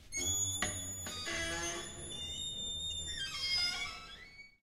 squeaky door inside
A wooden door closing with a squeak at the top of a staircase in a college towerblock. This was recorded using an AKG C1000s condenser mic.
squeaky, door